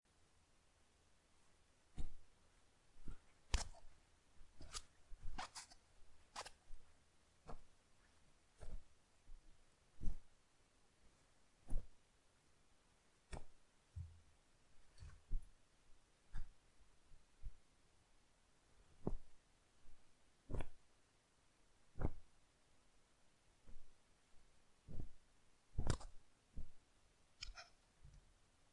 free sound, efects sonidos de sabanas moviendose de manera rapida